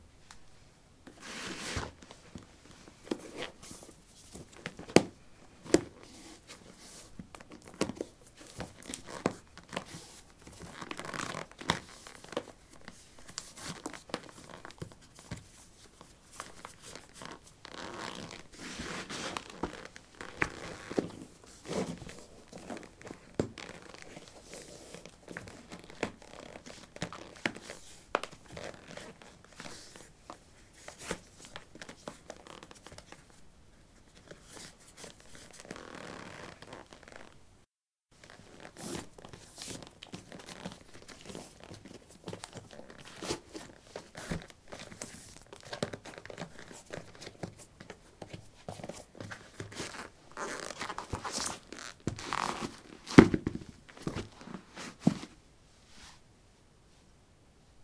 concrete 18d trekkingboots onoff
Putting trekking boots on/off on concrete. Recorded with a ZOOM H2 in a basement of a house, normalized with Audacity.
steps, step